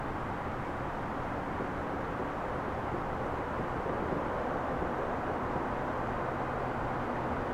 suburban wind
The sounds of the wind and ambience in a residential area.